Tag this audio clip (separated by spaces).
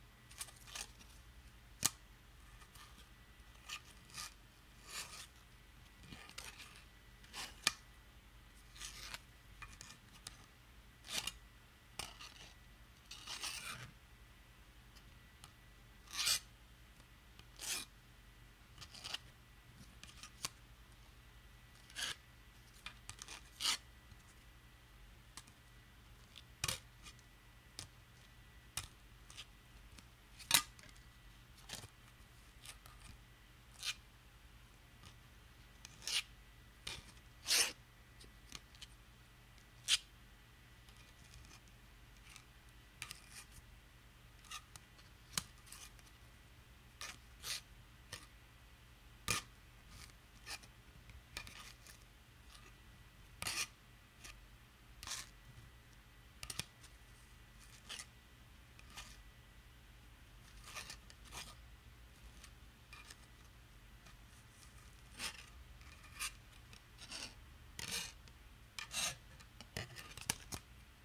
slide microscope plastic glass slides scrape projector